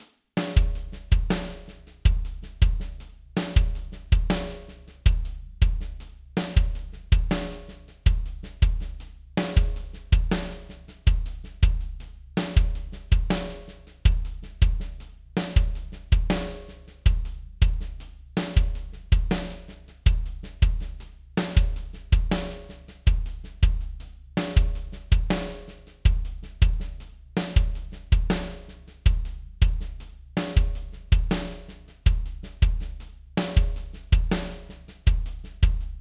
Song4 DRUMS Fa 4:4 80bpms
80, beat, blues, bpm, Chord, Drums, Fa, HearHear, loop, rythm